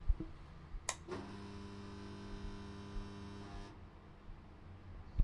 Turning on the lights